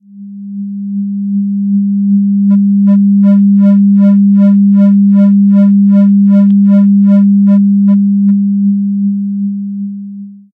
Generate Tone (200 Hz) waveform Sine. Fade in. Fade out.Change frequency (2.6 Hz) and add resonance (7). Standardize everything